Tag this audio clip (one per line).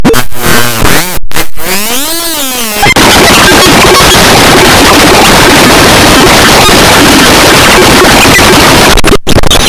circuit-bent
core